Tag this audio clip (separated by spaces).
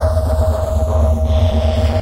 120-bpm loop rhythmic-drone ambient drone-loop